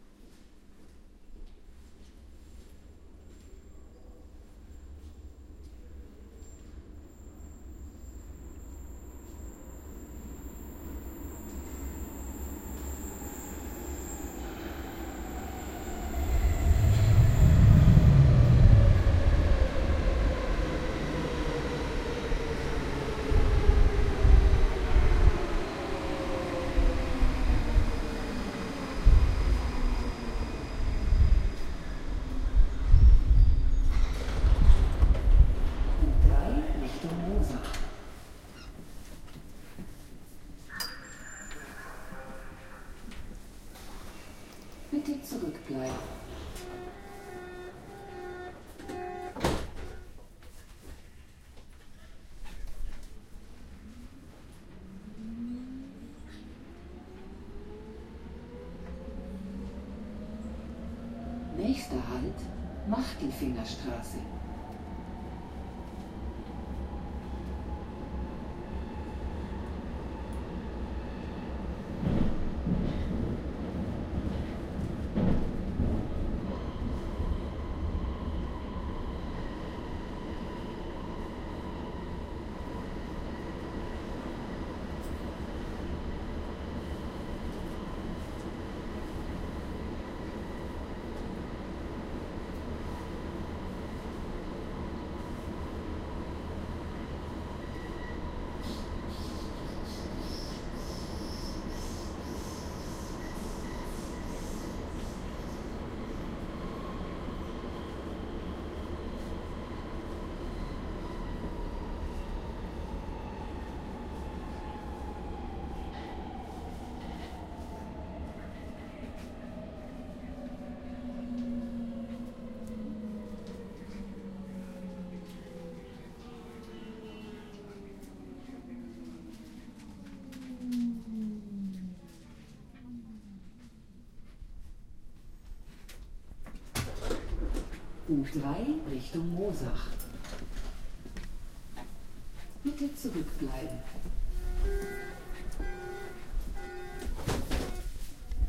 Munich subway train. Recorded with Zoom H1. Unfortunately with some wind noise.